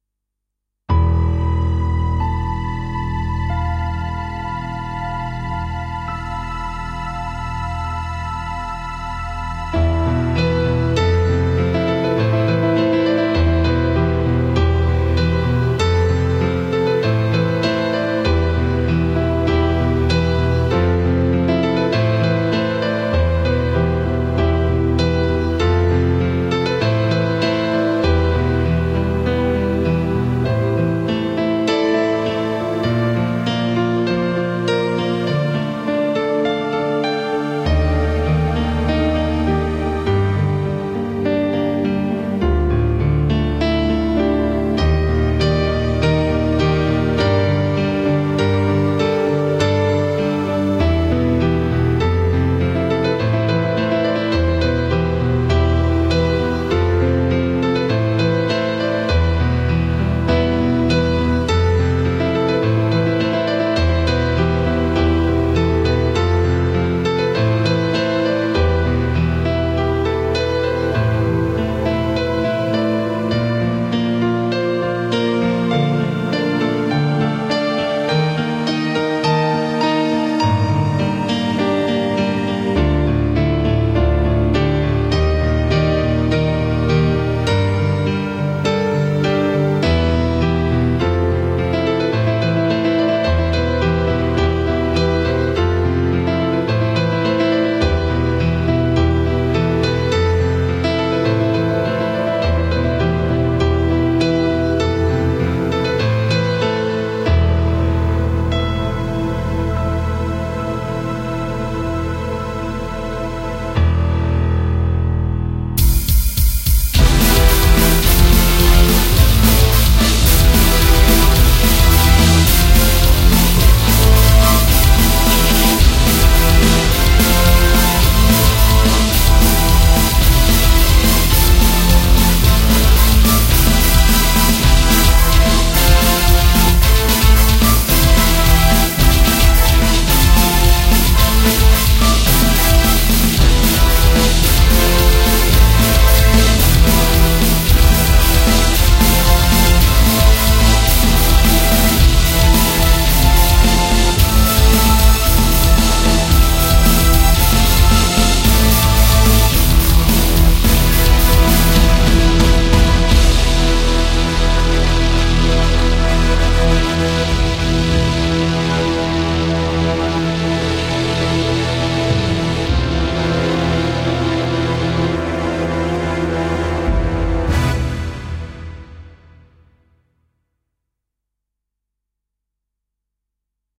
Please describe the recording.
"Broken Fable" is a Modern piano with Rock final Soundtrack, composed, performed and arranged by Mimmo D'Ippolito aka Eternalkeys - from "M. D'Ippolito's Eternalkeys" (2010)